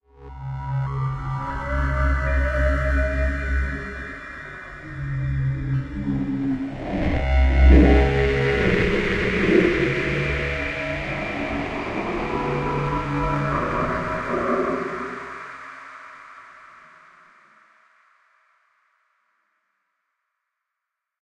Hidden Planet
A glitching sci-fi-inspired soundscape. I hope you like it!
If you want, you can always buy me a coffee. Thanks!
ambiance, atmosphere, soundscape